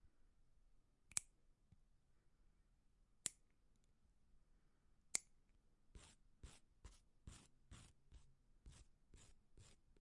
Cutting and filing nails.
Clip, clip-nails, cut-nails, file, file-nails, Nails, OWI, trim, trim-nails